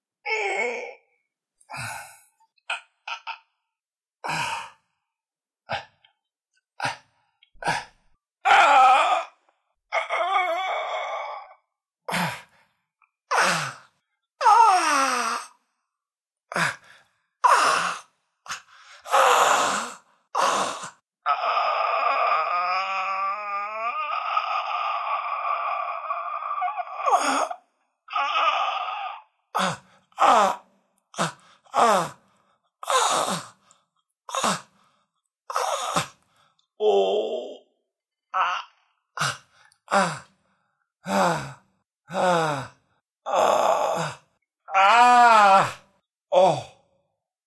Pain part 2: groan, torture, suffering, despair, man, male
torture, suffering, man, horror, voice, despair, pain, groan, male